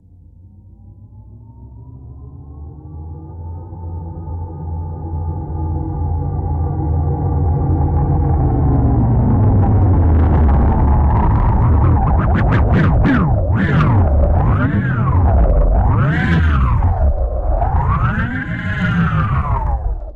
Ovni acelerating and decelerating